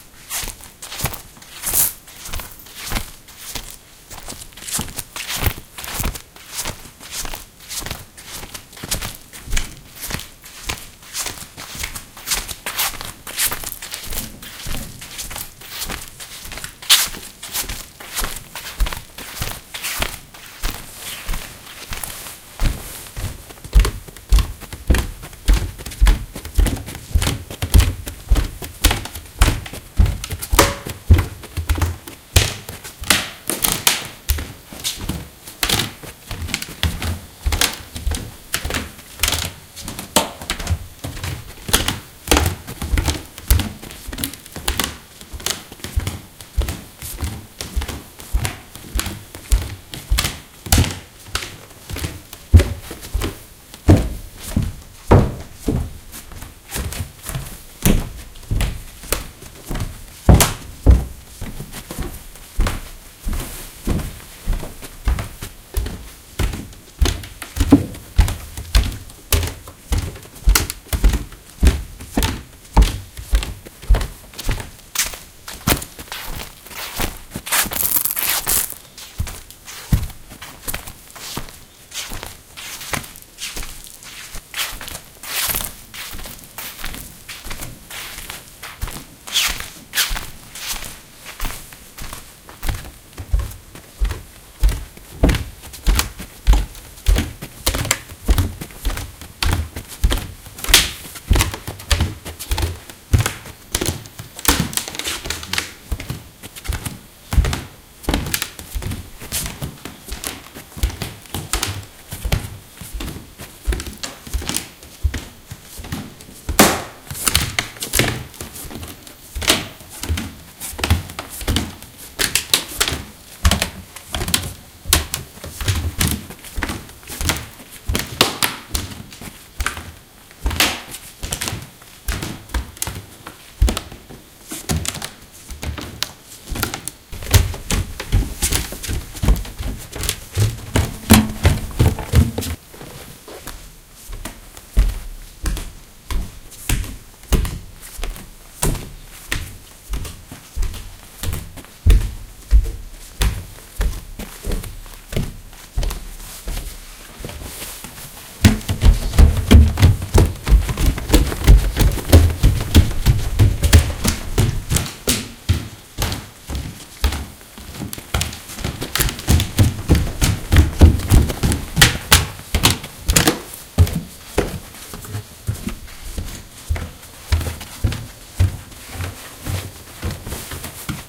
Recorded in Japan, Nagano, Ina, in a traditional Japanese farmhouse in the Japanese alps. Randomly walking around on tatami and wooden floors. An experiment recording footsteps in stereo (Zoom H2n recorder), hanging the recorder just above my feet. It's not perfect (too much background noise, sometimes some handling noises), but with the right editing I think you can get some cool samples out of this. Check out the other take as well.